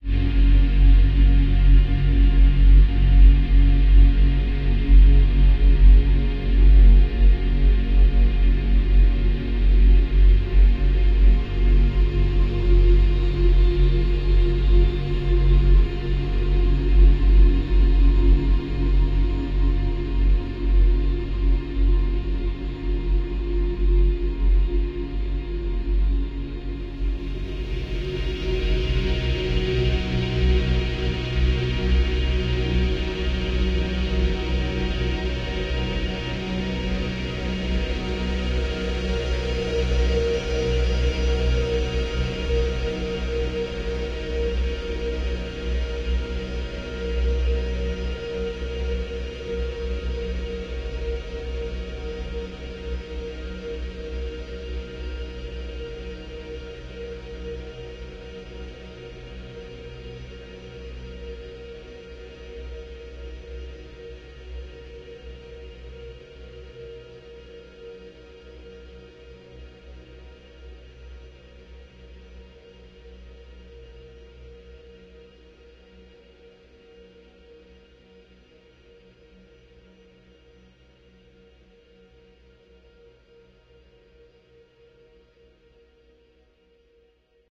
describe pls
Ambient Wave 37
Sound-Design, Drums, Drone, Ambient, Loop, commercial, Piano, Atmosphere, Looping, Ambiance, Cinematic